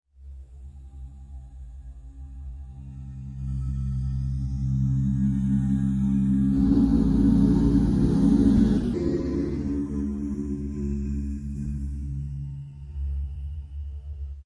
long processed vocal drone w/ "glitch" at height of swell.
glitch, drone, voice, envelope, swell